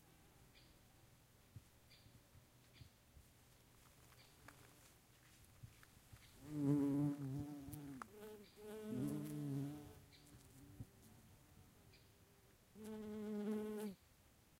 Bees buzzing 1
Bees and bumblebees buzzing around lavender.
bee, bumblebee, buzz, insect